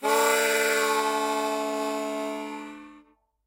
Harmonica recorded in mono with my AKG C214 on my stair case for that oakey timbre.
g, harmonica